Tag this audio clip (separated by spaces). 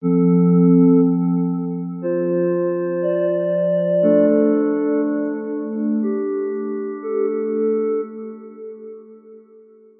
cinematic epic orchestral soundtrack trailer